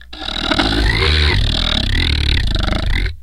growl.oeoeoe.01
instrument, idiophone, daxophone, wood, friction